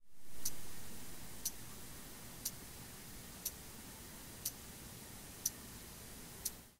I used my watch that I would always hear late at night
clock, watch